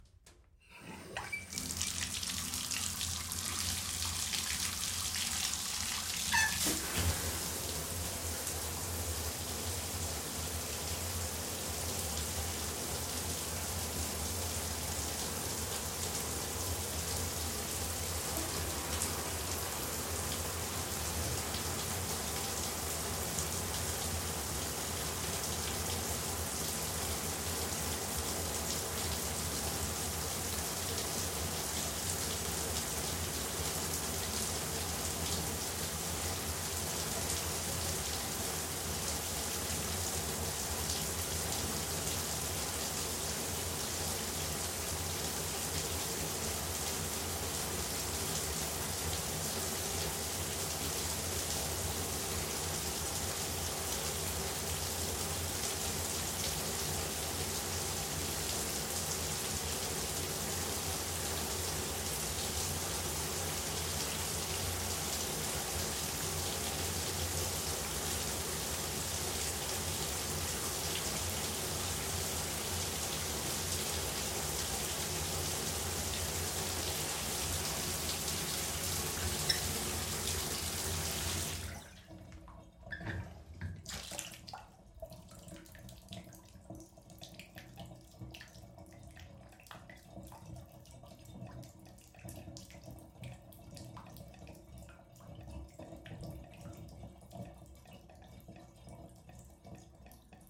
Shower turning on and off with drain noise
Shower turning on, running, then turning off
bathroom, drain, drip, running-water, shower, water